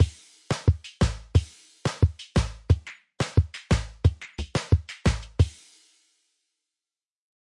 Chilly Billy 89BPM
A chilling drum loop perfect for modern zouk music. Made with FL Studio (89 BPM).
beat, drum, loop, zouk